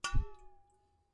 A little hit to a metallic water boiler
frecuencies, ondulating, pressure, n, hit, presi, percussive, metallic, metal, percussion, golpe, olla